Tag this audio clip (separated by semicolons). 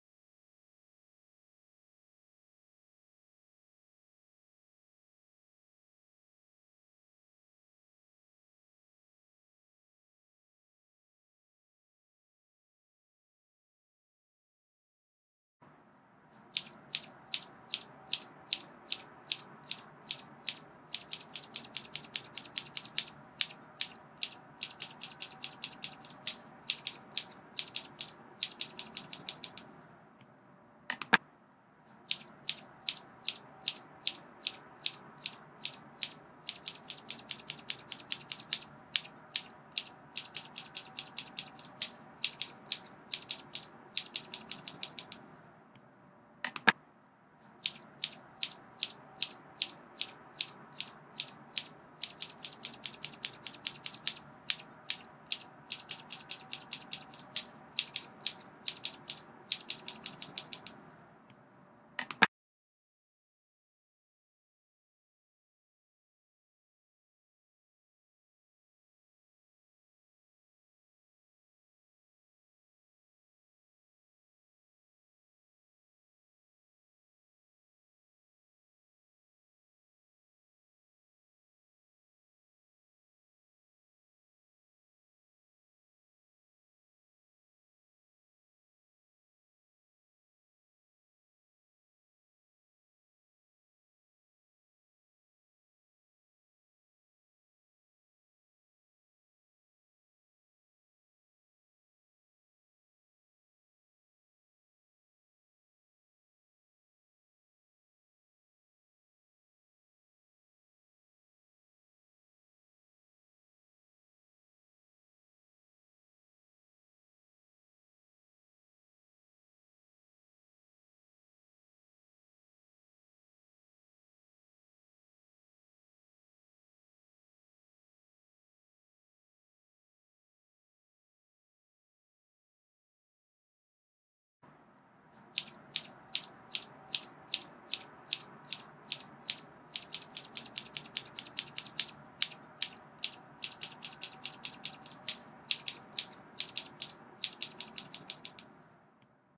Scissor; Snipping; MacBook